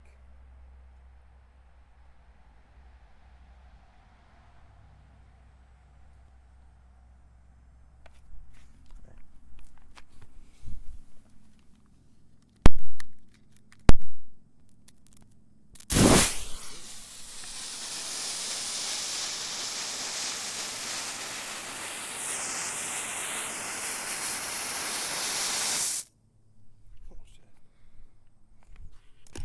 Firework Fuse
A fuse lit but not attached to anything in particular. Useful in tandem with other samples. Recorded using a Tascam DR-05x.